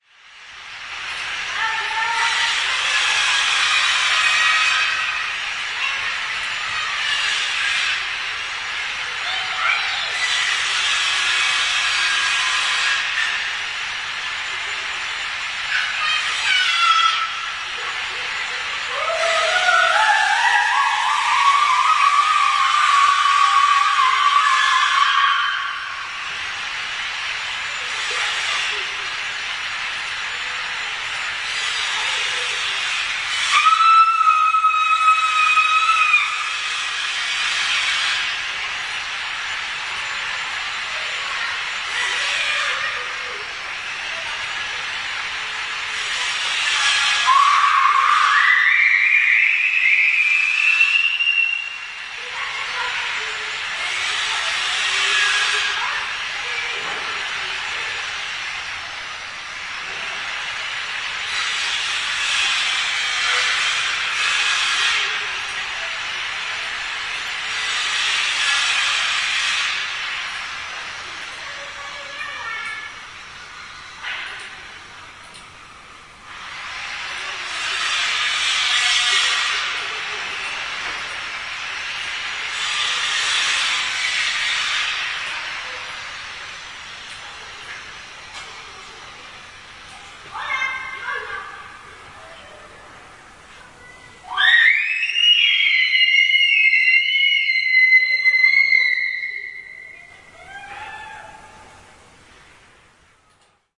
cutter and children040910
04.09.2010: about 18.00. the noise of cutter used by one of my neighbours mixed with a squealing of children playing on the courtyard. children are imitating the cutter sound.
poland, poznan, squealing